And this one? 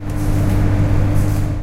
This sound was recorded in the bar of Campus Poblenou using the microwaves.
We can heard the sound of a microowaves working on.
campus-upf, microwaves, UPF-CS14